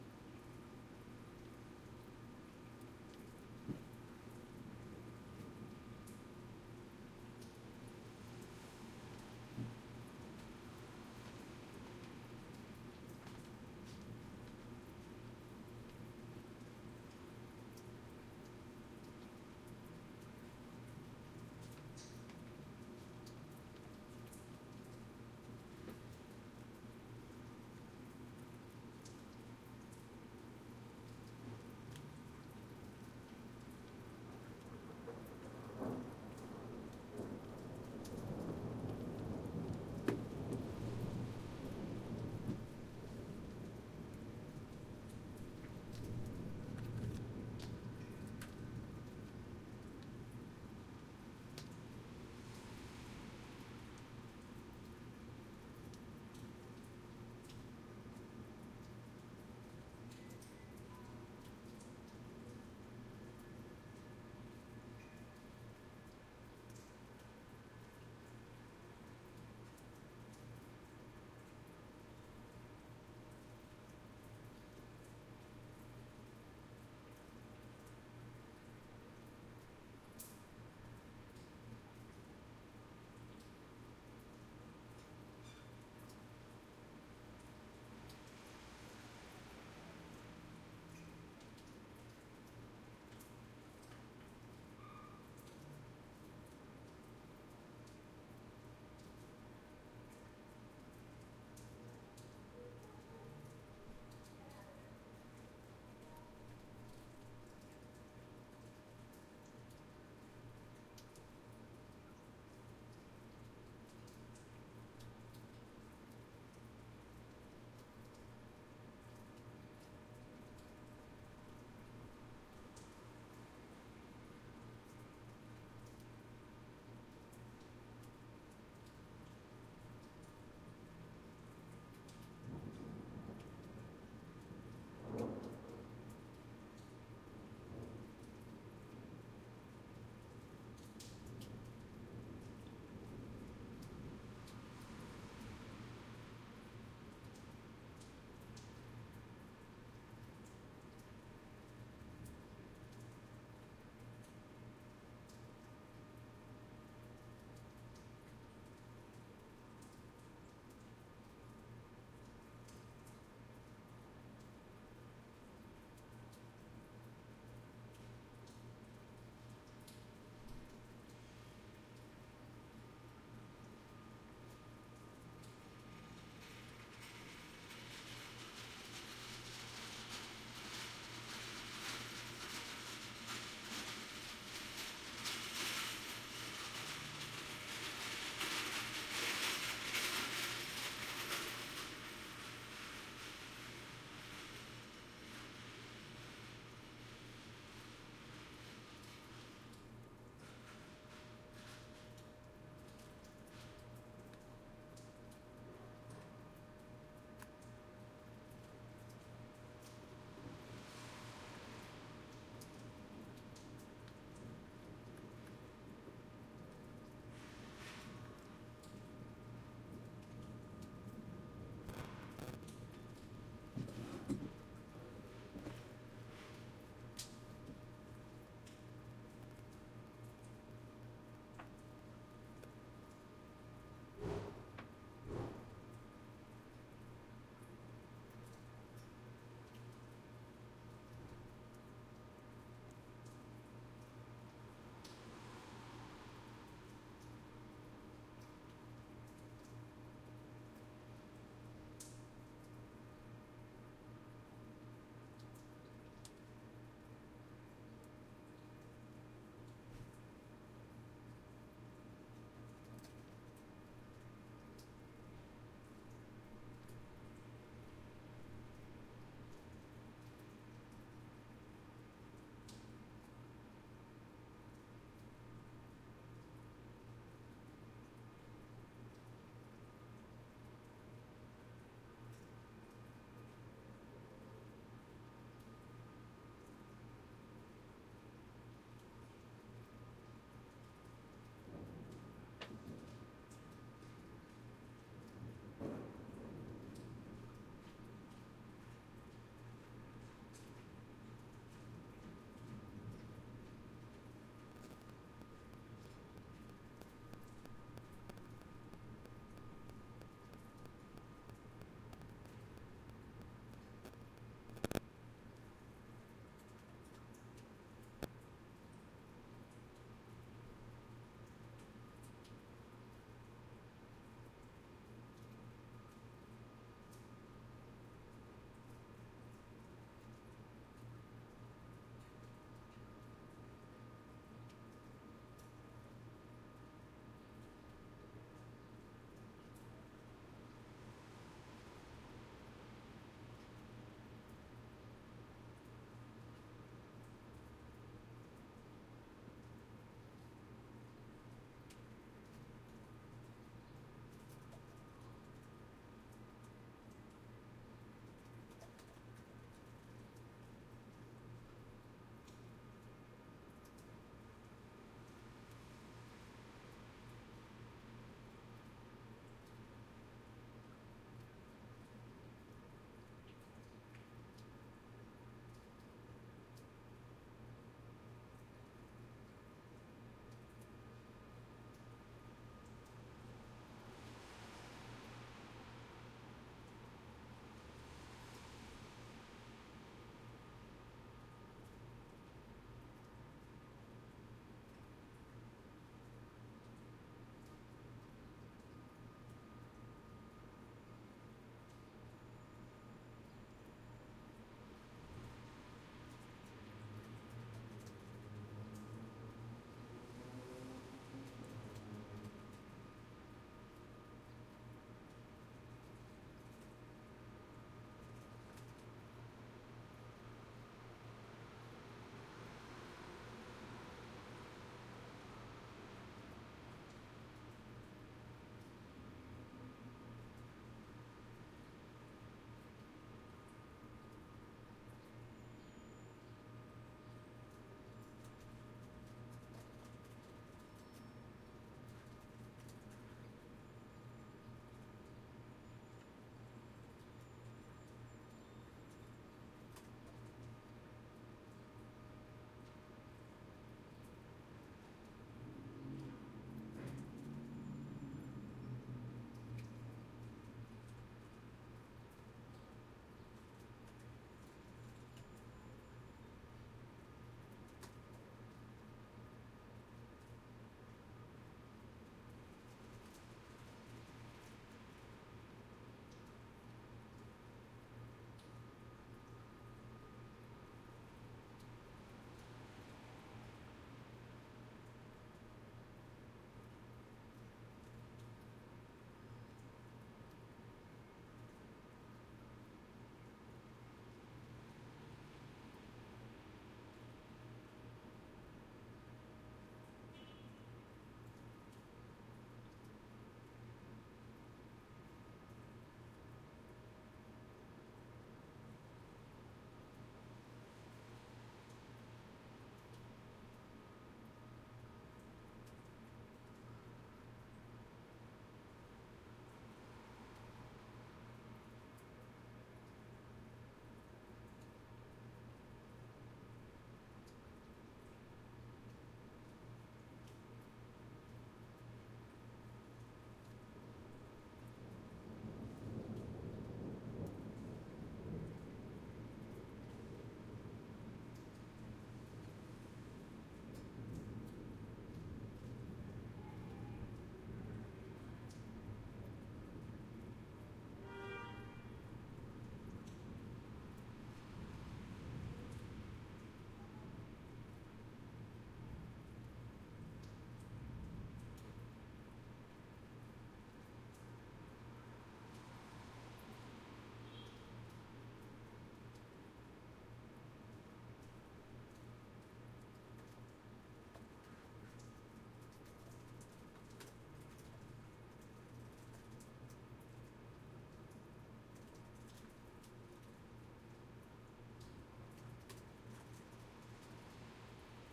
Light Rain and Thunder, New Haven, 2022-05-28 14.52.23
A light rain and some thunder recorded from the inside of my apartment, to a light open window on my Shure MV88. Unprocessed. Some cars passing by, rain receding.
field-recording, indoors, nature, storm, thunder, thunderstorm